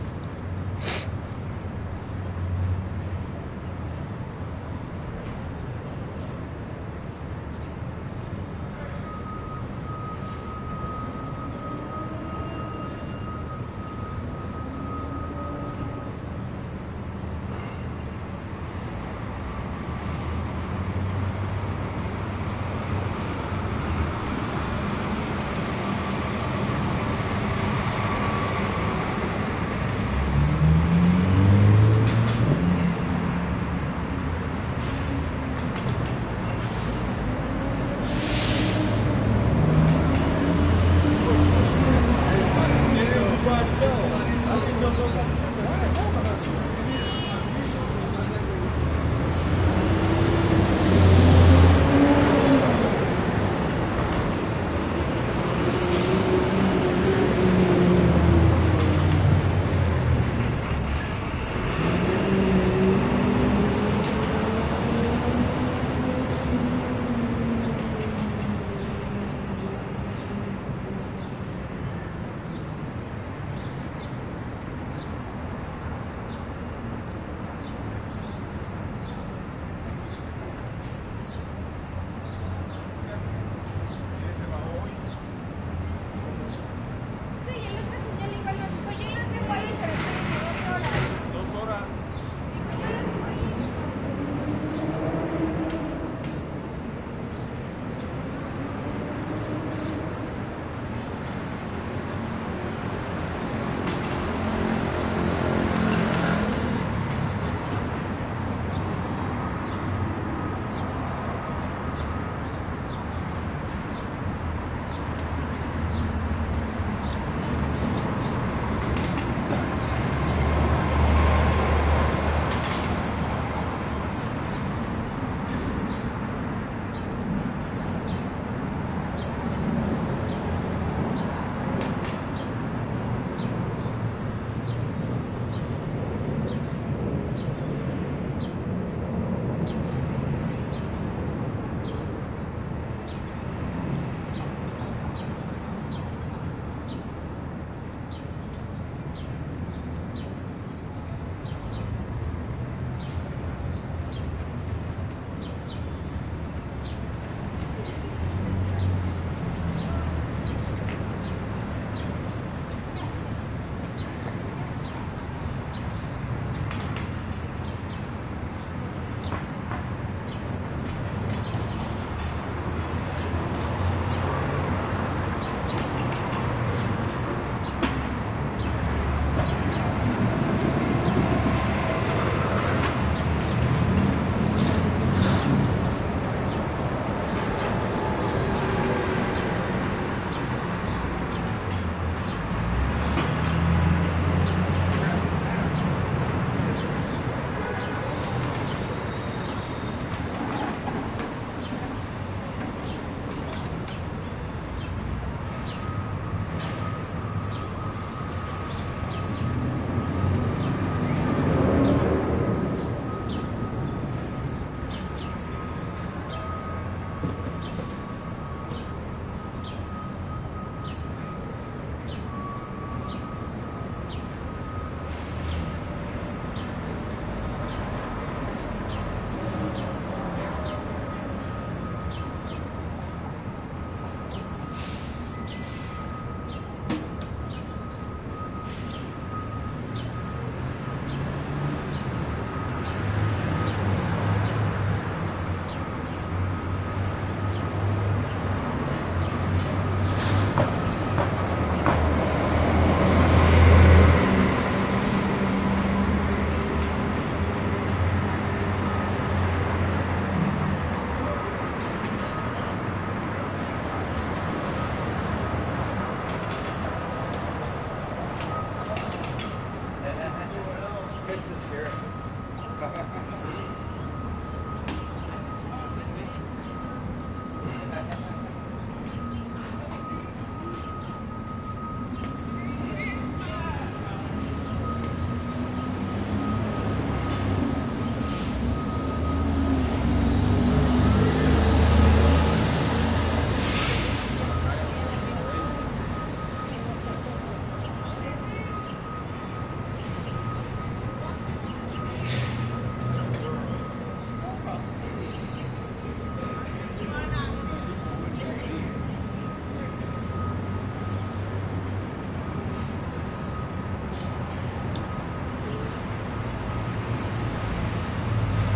Downtown LA 05
One in a set of downtown los angeles recordings made with a Fostex FR2-LE and an AKG Perception 420.